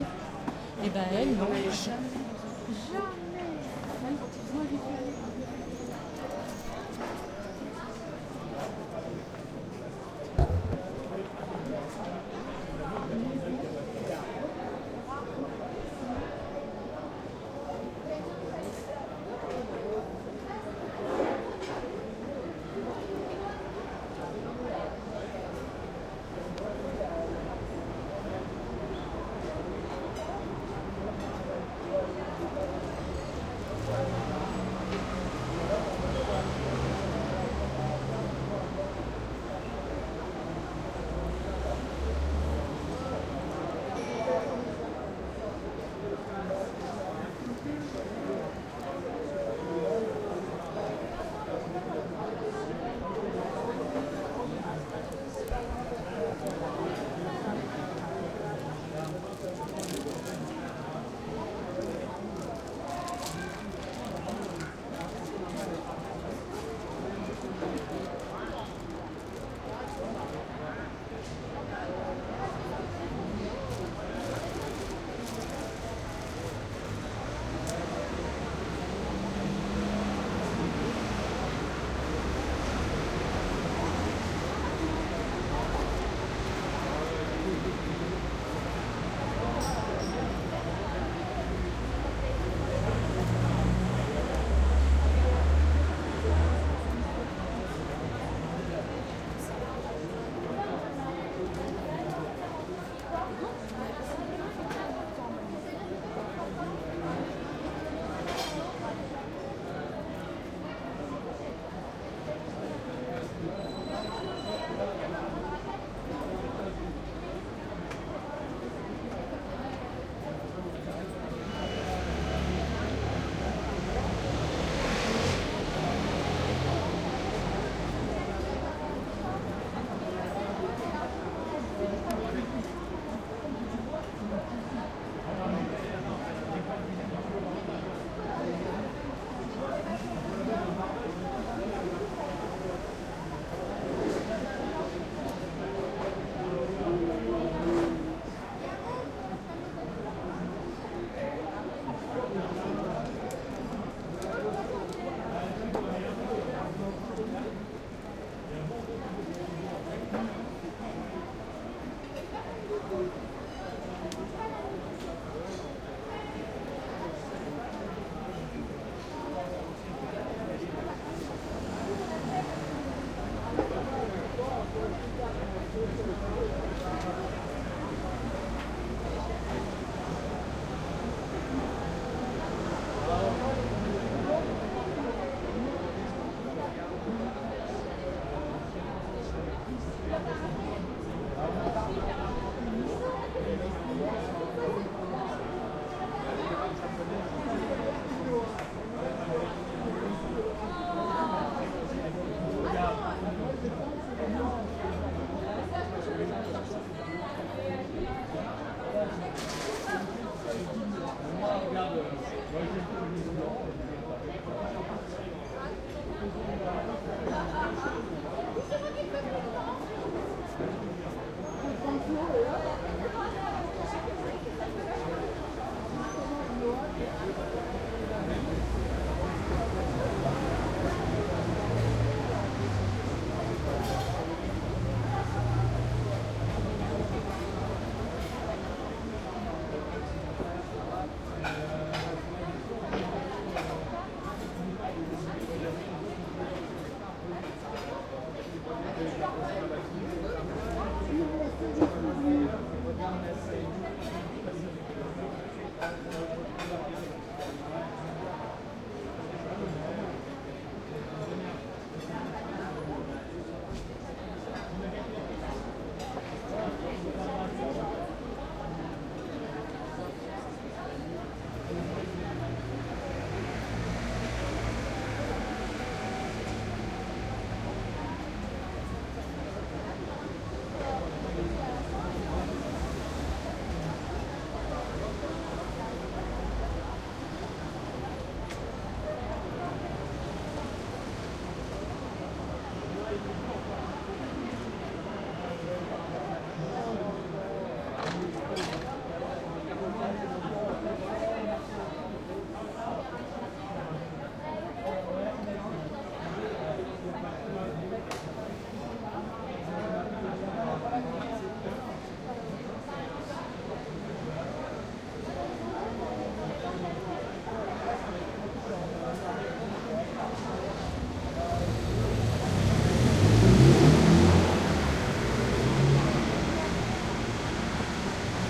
recorded next Chez Francis in Montmartre Paris. People at the coffe terasse with trafic, 25 05 2010, 10 PM. ZOOM H2, 2CH Mic Gain M
paris, terasse, coffe, night, traffic, sound, city